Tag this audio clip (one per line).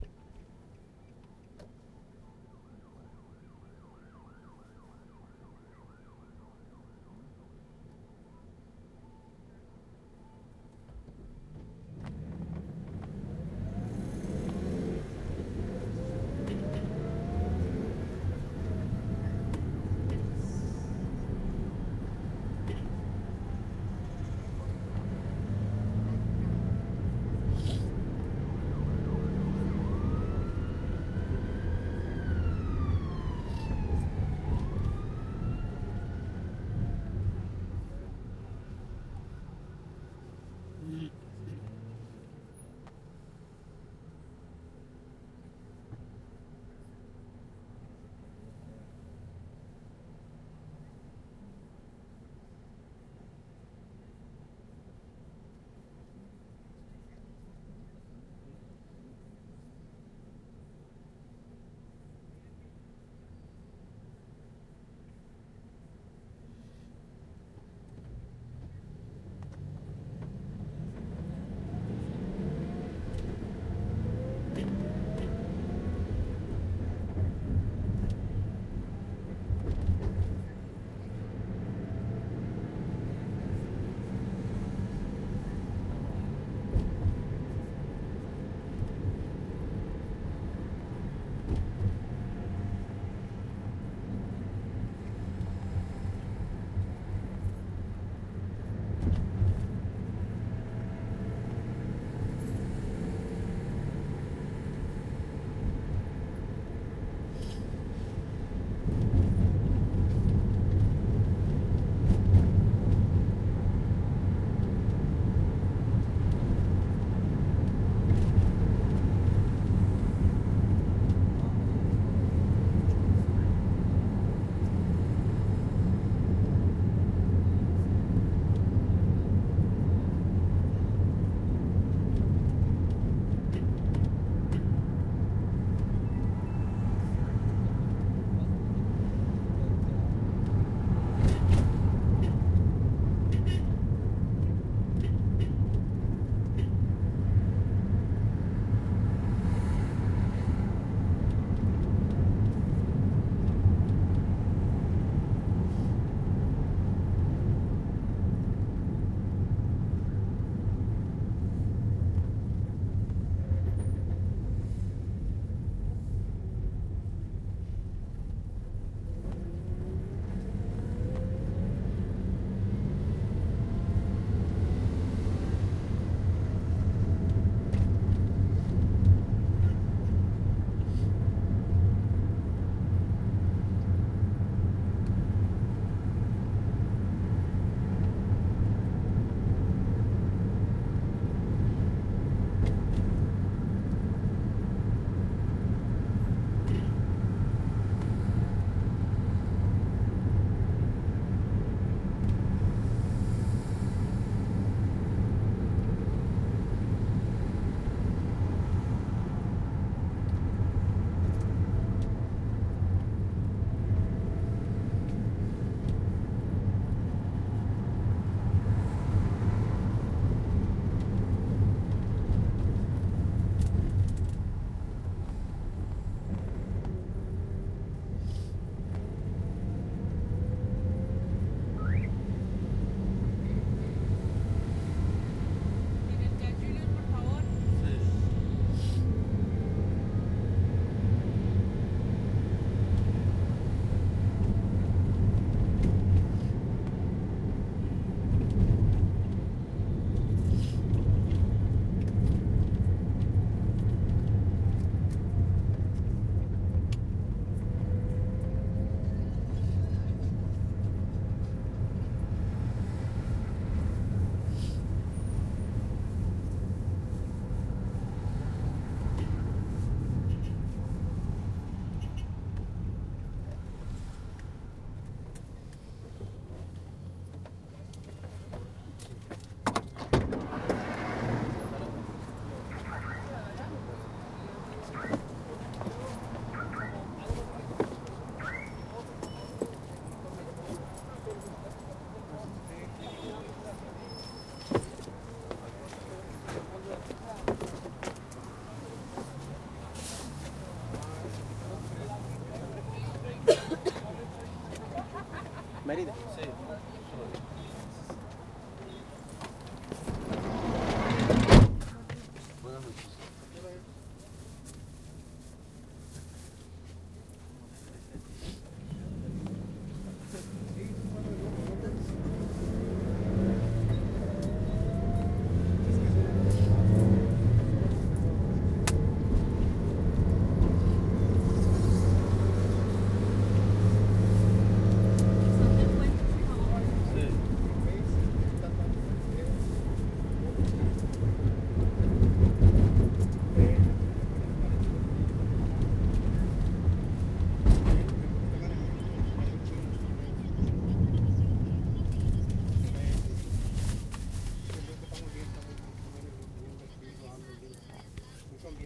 Mexico
traffic
urban
Spanish
car
cell-phone
ambience
street
cars
field-recording